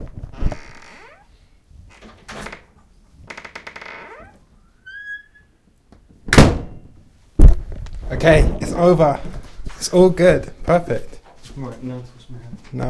the sound of a door slamming
close, closing, door, doors, london, shut, slam, slamming, wooden